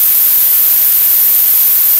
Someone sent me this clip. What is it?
Analogue white noise BP filtered, center around 1.35kHz
Doepfer A-118 White Noise through an A-108 VCF8 using the band-pass out.
Audio level: 4.5
Emphasis/Resonance: 9
Frequency: around 13.5kHz
Recorded using a RME Babyface and Cubase 6.5.
I tried to cut seemless loops.
It's always nice to hear what projects you use these sounds for.